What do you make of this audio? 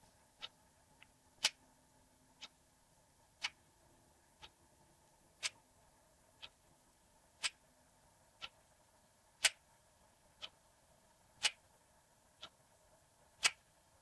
Here is a loopable sound of a small ticking clock
Recorded with Sony HDR PJ260V then edited with Audacity